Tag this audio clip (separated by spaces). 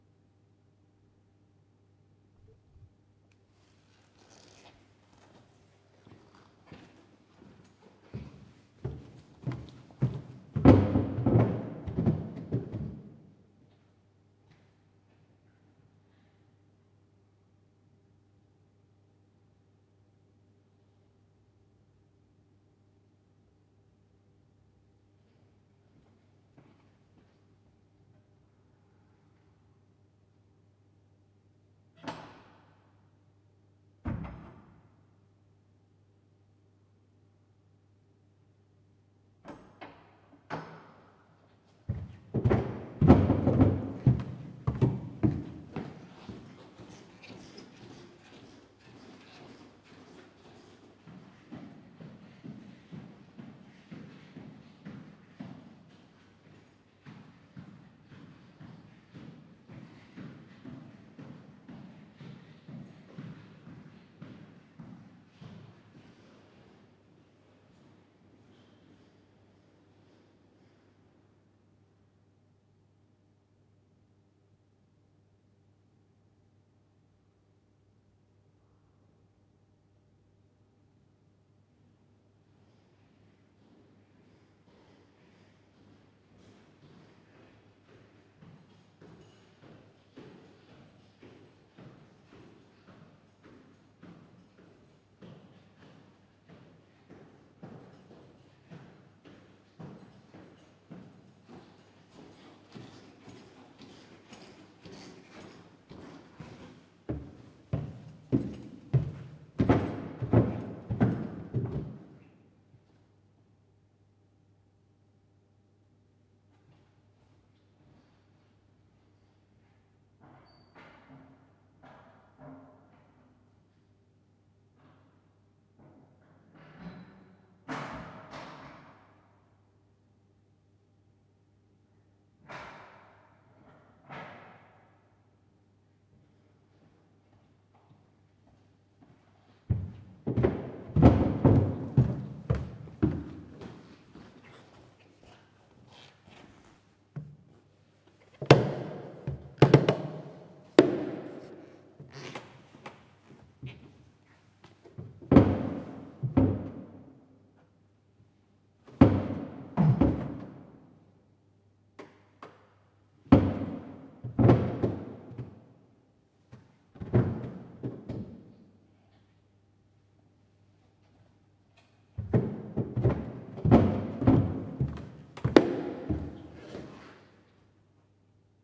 door Noirlac-Abbey footsteps France creak